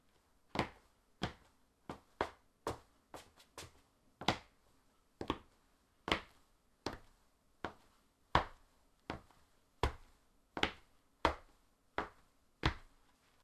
Footsteps on concrete